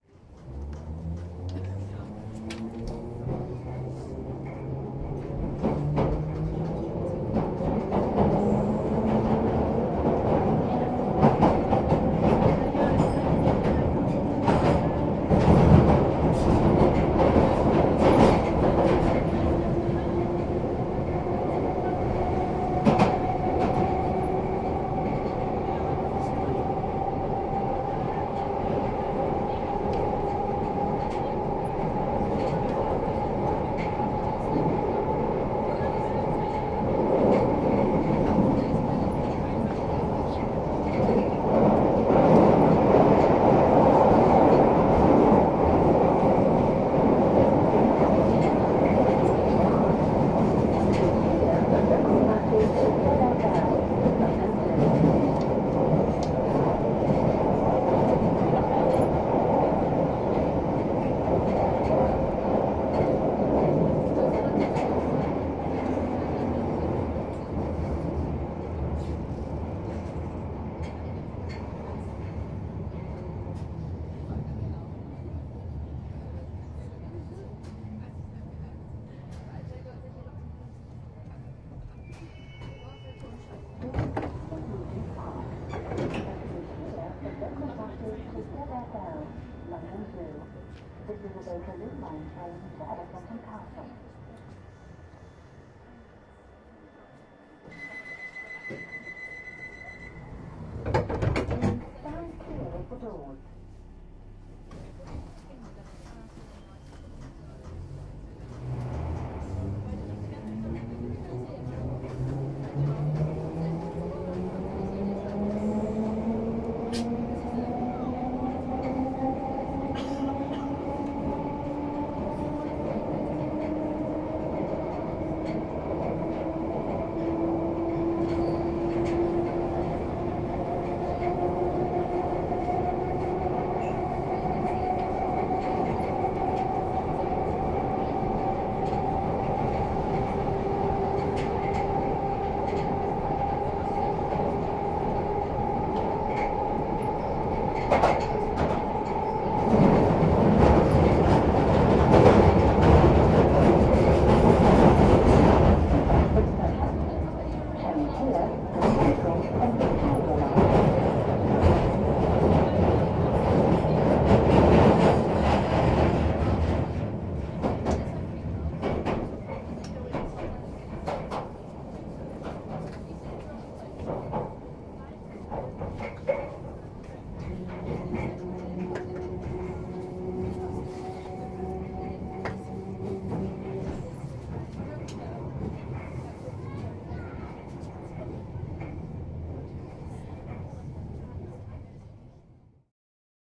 interior atmo of train leaving the baker street tube station in london, heading to regent's park.
recorded with a zoom h-2, mics set to 90° dispersion.